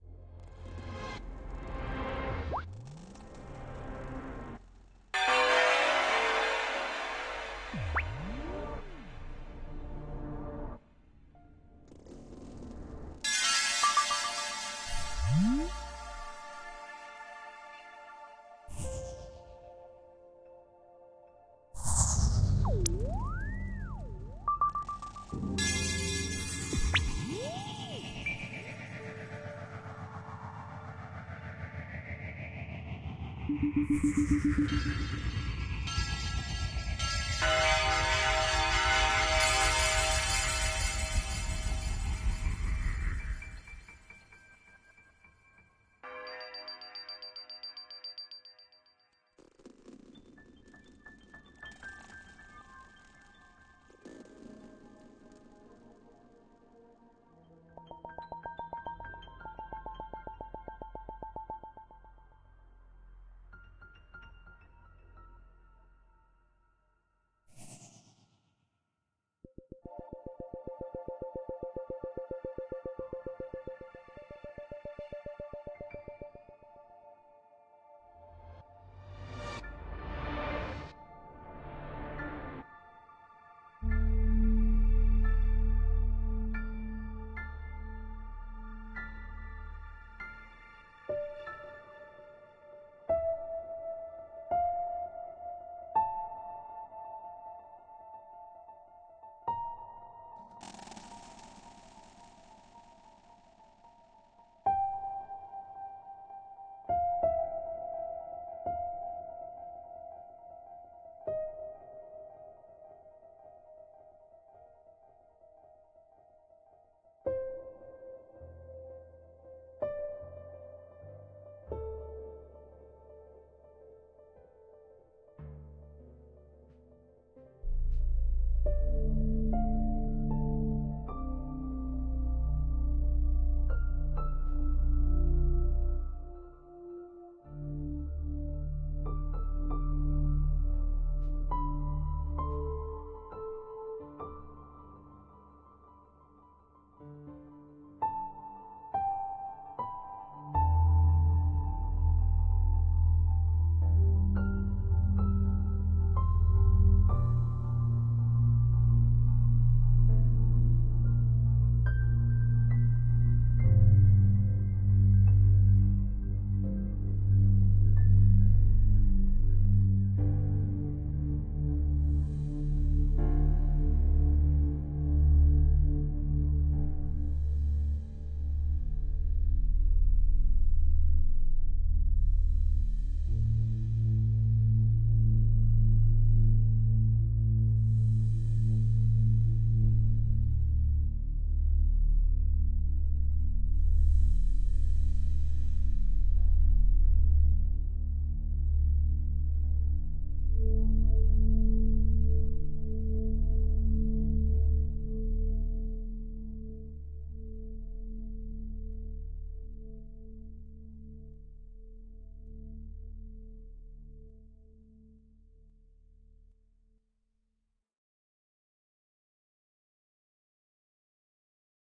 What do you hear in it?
Crazed and confused collection of sounds and noises to indicate confusion/dream sequence/desperation/madness. Some thematic linkage to Dark Ice Chords and Dark Piano